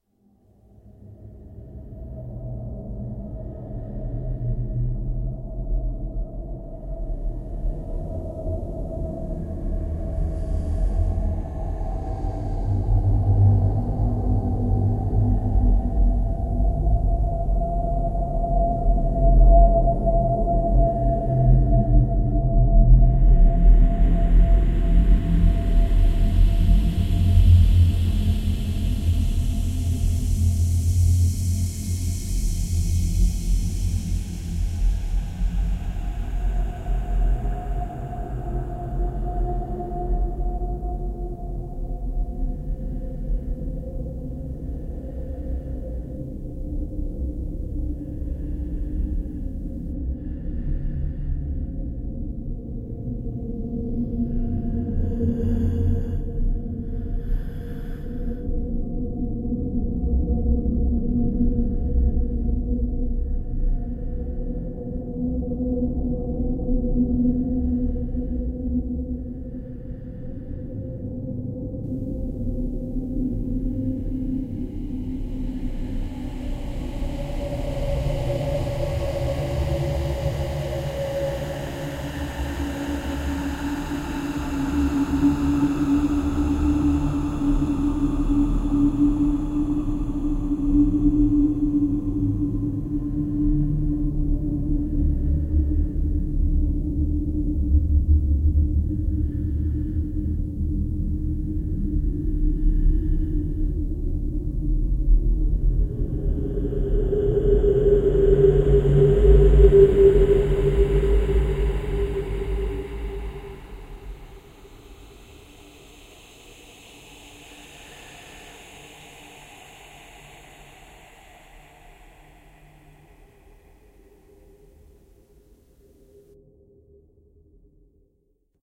Shadow Maker-Closet
Hours of hiding in the Closet without knowing that you're not alone...
What you hear is the sound of an old mystic Engine, or something else, i don´t know. it haunts this old house for almost ten years. Will you discover the truth of tze old Stairs leading up on the next floor? I made it with Audacity. Use it if you want, you don´t have to ask me to. But i would be nice if you tell me, That you used it in something.